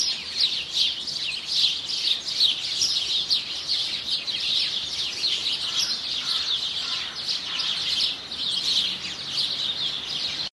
best bird spot ever
Birds in urban background
bird, singingbirdsongbird